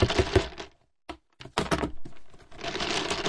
delphis ICE DICES GLORIX no BPM
PLAY WITH ICE DICES IN A STORAGE BIN WITH A LITTLE BIT OF BLEACH! RECORD WITH THE STUDIO PROJECTS MICROPHONES S4 INTO STEINBERG CUBASE 4.1 EDITING WITH WAVELAB 6.1... NO EFFECTS WHERE USED. ...SOUNDCARD MOTU TRAVELER...